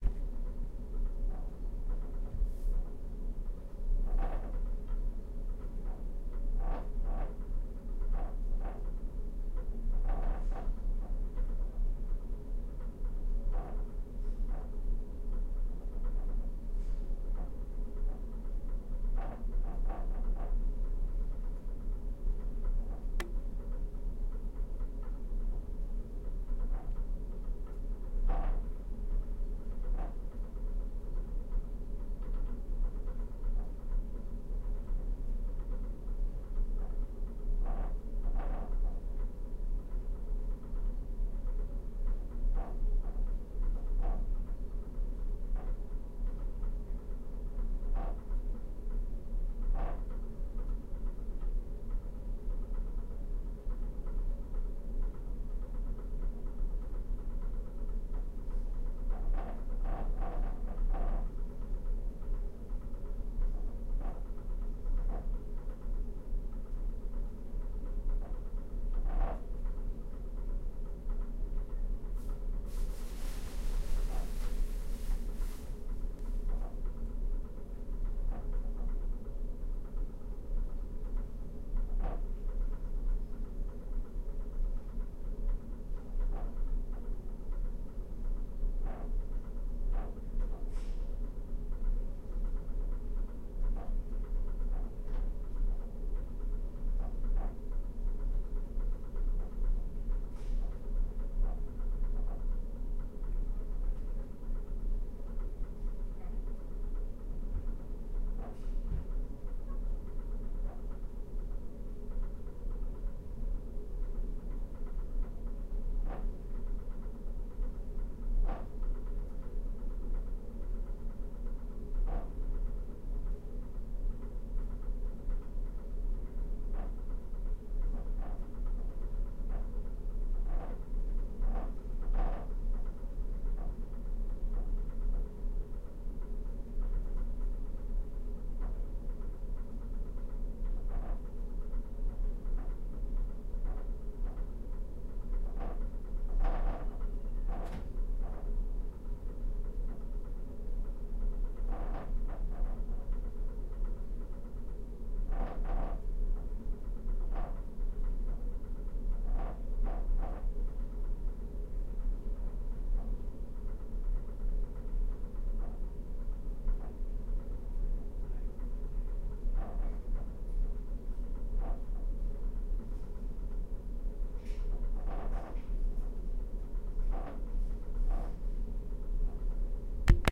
Recorded with a Zoom H1 in a cabin on the ferry Scottish Viking between Nynäshamn and Ventspils. The geotag could be a bit wrong.